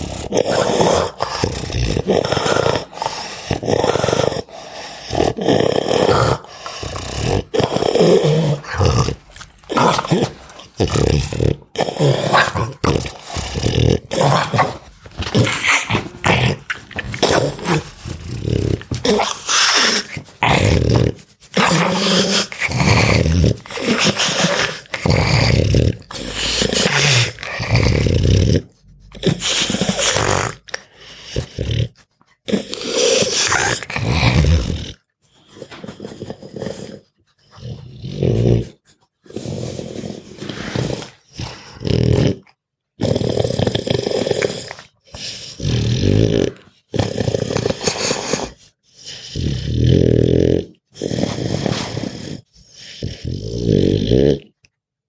Pug Dog Grunts, Breaths, Snarls
My friend's dog makes these strange and scary sounds, so I had to capture what I could. This is a small selection of up close recordings of a pug grunting, snoring, breathing into a Sony M10. Great for mangling into monster sounds.
little, pug, pitch, grunt, audiodramahub, growl, snore, monster, breath, sinister, animal, small, dog, snarl, design, demon, sony-m10, fantasy, creature